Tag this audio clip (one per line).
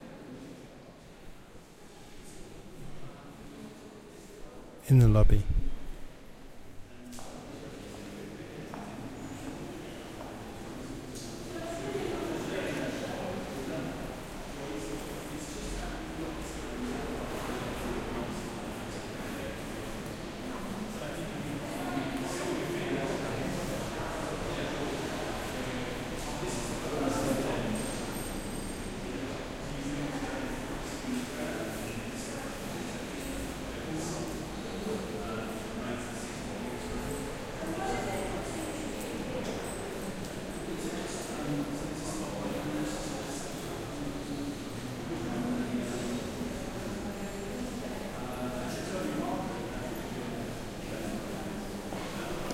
Gallery
Museum
Ambience
Art
Footsteps